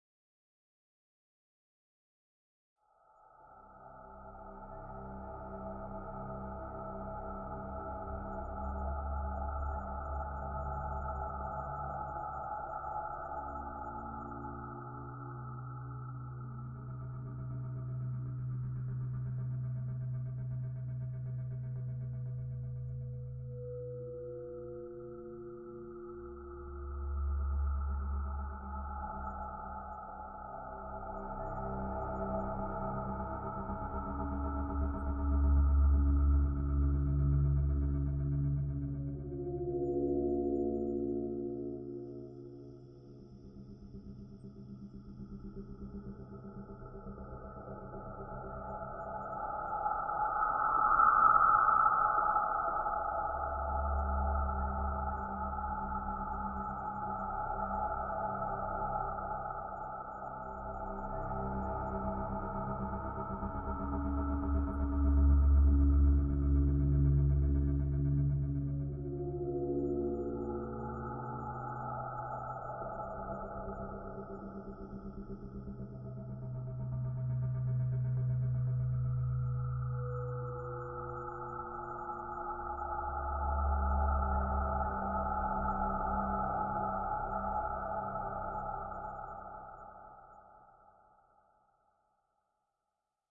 A dark and slowly evolving ambient pad sound that swirls and changes in a subtle way, with intermittent rhythmic overtones. Generated using Camel Audio's Alchemy sound engine.